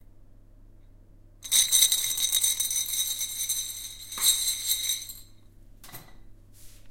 Shaking with a glass full of small pieces of ice.